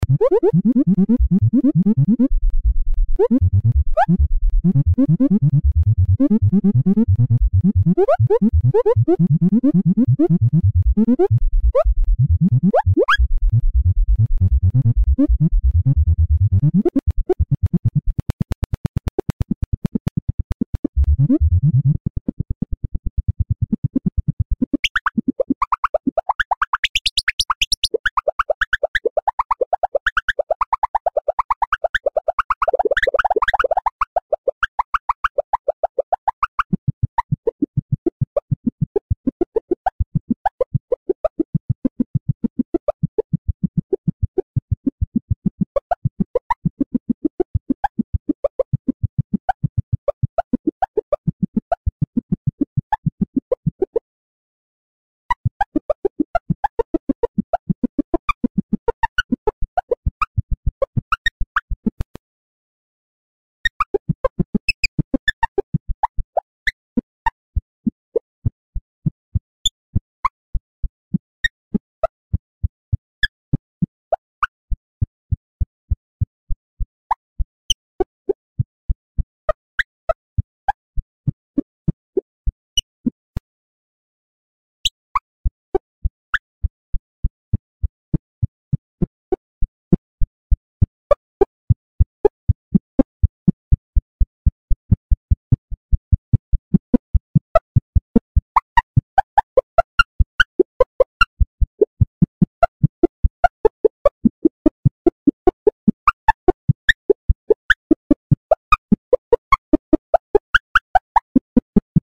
this is an artificial lifeform. the sound of a worm striving to be free in a hostile ambient.
Done for video game sound design , Sine tones pitched up and down randomically , then ran through a max granular patch.